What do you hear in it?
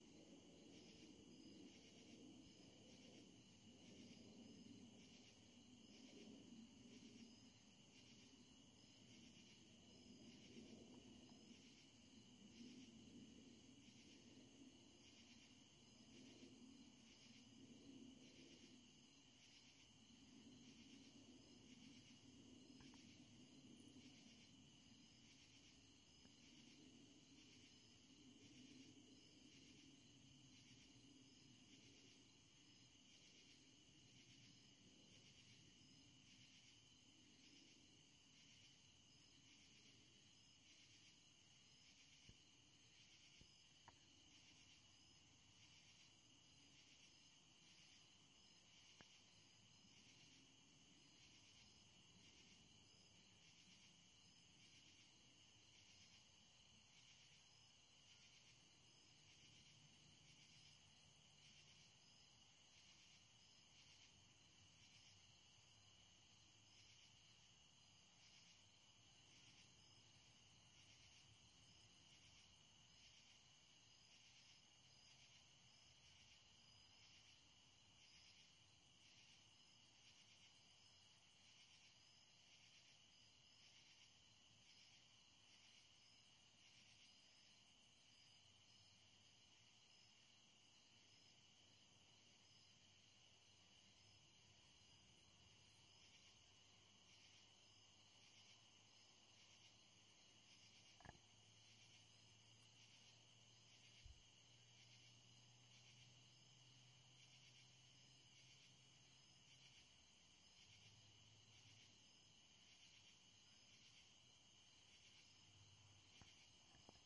NIGHT AMB 0325
crickets, noise, road, sounds